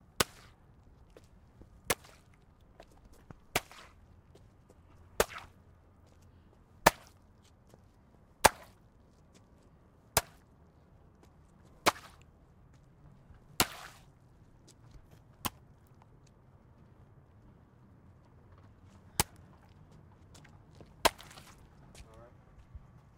pig head hammer wet
hammer, caved, pig, skull, head, bash, has, after, rainand, field-recording